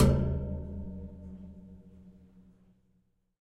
efan grill - hit 2
An electric fan as a percussion instrument. Hitting and scraping the metal grills of an electric fan makes nice sounds.
electric-fan, metallic, reverberation, sample